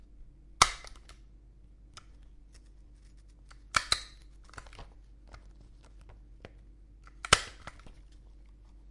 A stapler stapling paper. Or fingers.